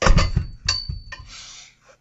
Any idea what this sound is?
awfulTHEaudio runterfallen 03
2 things falling on an carpet ground touching an metal chair, taken with AKGc4000b
ground, carpet, metal, thing, falling-down